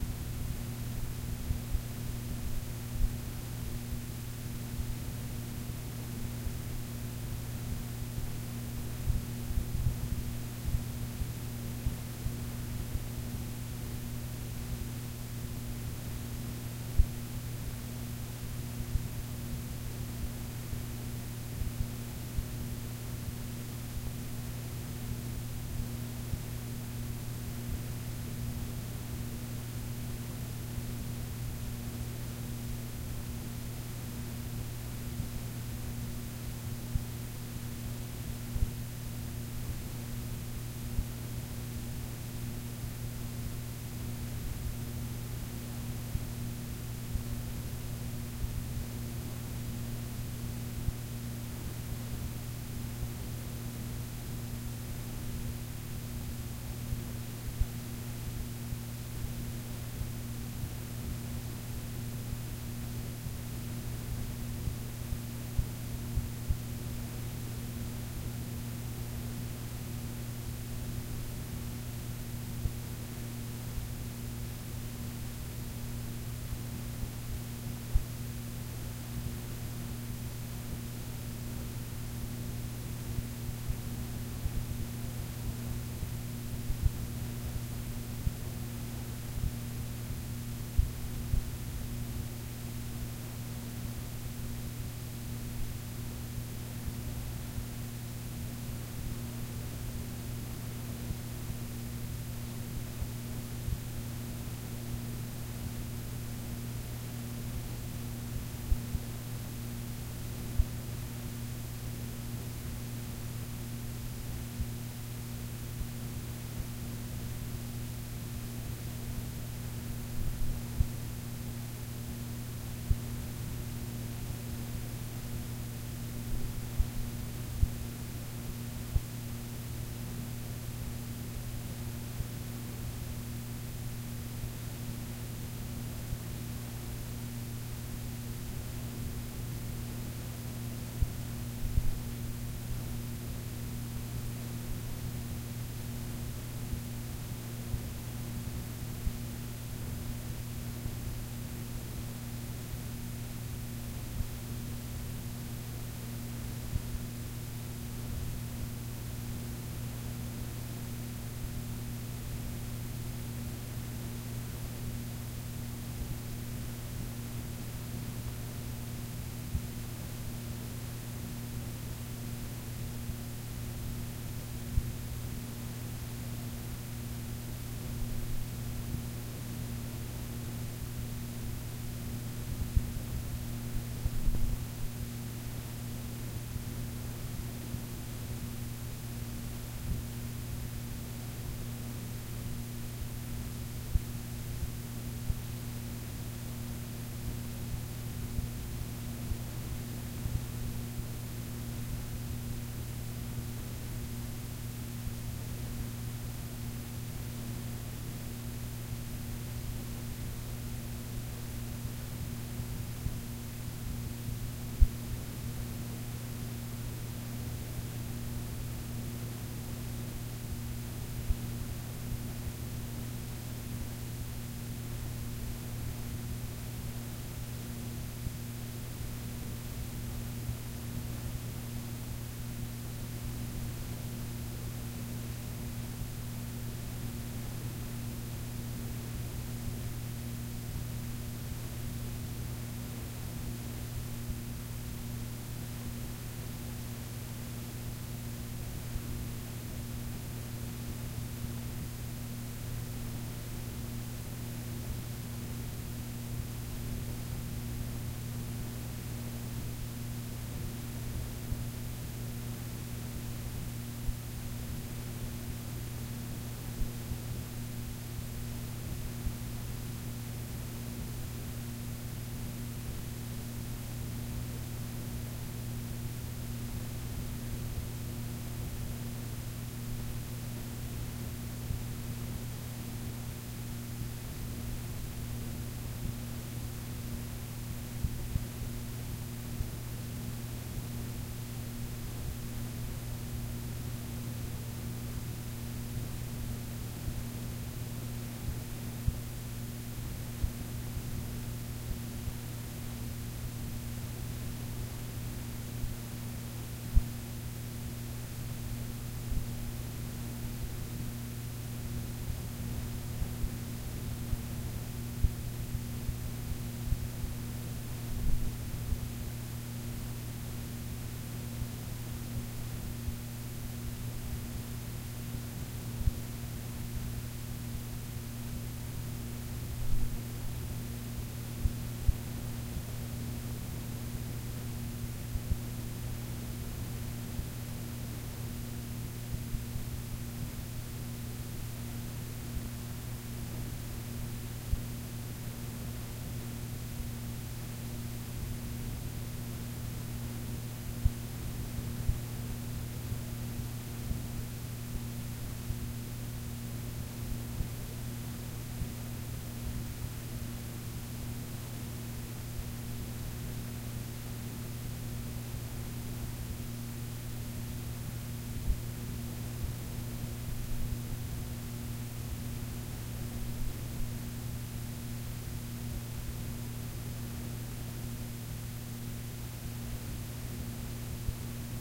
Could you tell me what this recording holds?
Nice Tape Hiss
Nice warm tape hiss from a cassette, peaks around -20 dB if I recall.
warm, warmth, hiss